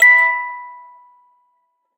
metal cracktoy crank-toy toy childs-toy musicbox